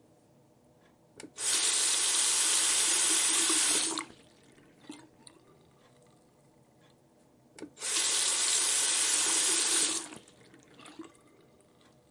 A quick recording of turning on/off the sink or foley. Recorded on the zoom H5 stereo mic. I cleaned up the audio and it is ready to be mixed into your work! enjoy!